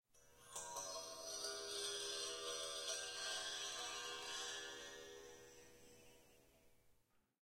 lightly scraping metal stairway railing tube with knife